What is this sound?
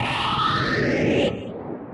FTZ GC 105 SS06
Intended for game creation: sounds of bigger and smaller spaceships and other noises very common in airless space.
How I made them:
Rubbing different things on different surfaces in front of 2 x AKG C1000S, then processing them with the free Kjearhus plugins and some guitaramp simulators.